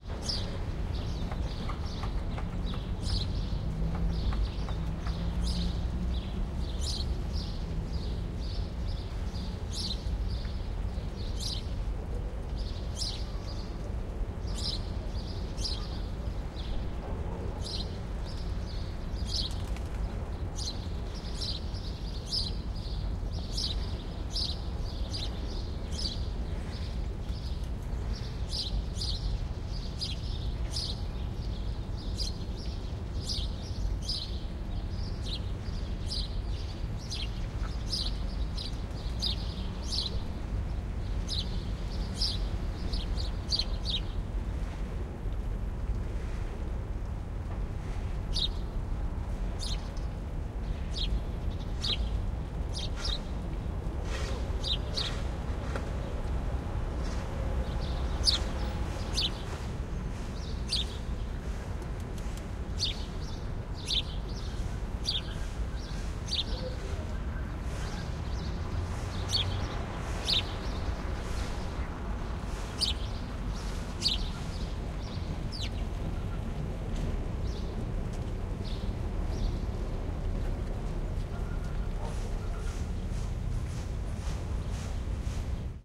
ambience - Moscow city birds in wintertime
field-recording, Russia, Moscow, ambience